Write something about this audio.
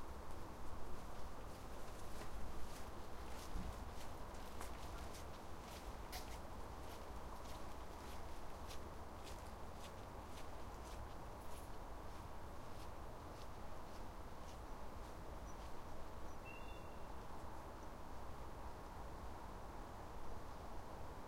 Walking to the car, taking out the keys and press the remote to hear the car's bleep as it unlocks.
bleep, car, car-key, footsteps, keys, signal, steps, unlock, walk, walking, wet-street